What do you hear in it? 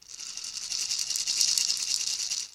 Maraca Rolling
Native Wooden Maraca Hit
Homemade Recording
Part of an original native Colombian percussion sampler.
Recorded with a Shure SM57 > Yamaha MG127cx > Mbox > Ableton Live
sample, hit, homemade, sound, wood, Latin, Maraca